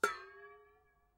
a little punch to a metallic water boiler.
presi, percussive, metal, percussion, n, metallic, golpe